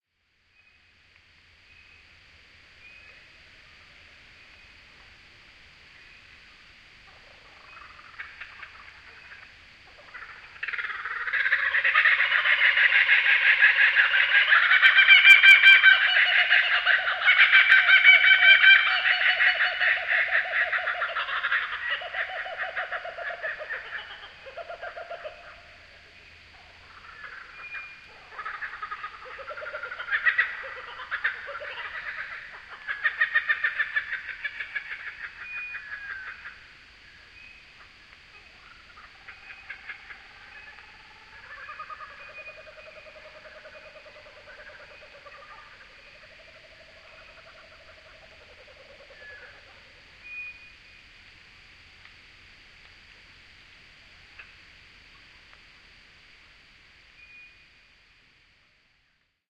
Fryers Forest Kookaburra's
Here are my neighbours the Kookaburras. Every night just as the sun has gone down these guys settle in for the night. You can also here some children calling in far distance.
atmos, australia, australian, bird, birds, field-recording, kookaburra, nature